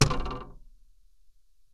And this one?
Rattling metallic sound. Contact microphone recording with some EQ.
Hit, clang, rattle, Metallic